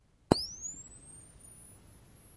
Flash charging
Flash gun charging